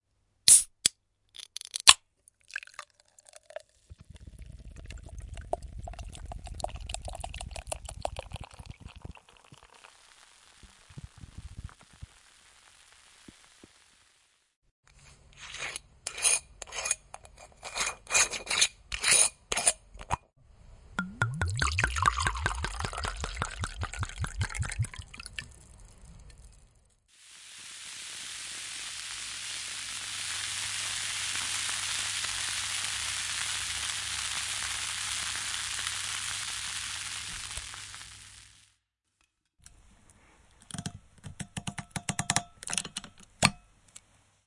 Alcohol FX
An assortment of drinking related sounds.
Enjoy and sorry about that noise floor.
Evil Ear